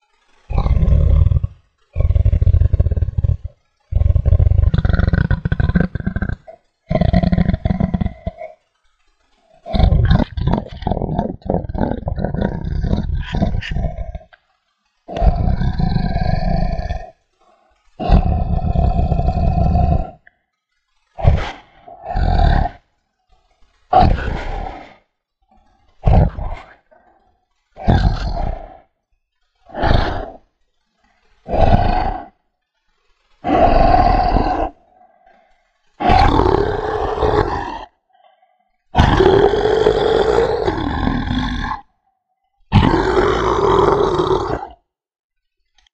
Dragon Long 1/14
Fits any thing with dragons and dinosaurs
Dinosaurier, Dragon, Monster, Speak, breathing, dinosaur